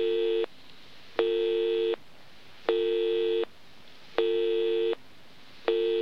broken dial tone
BT Dial Tone (broken)